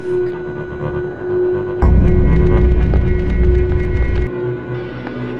strings violin slow sample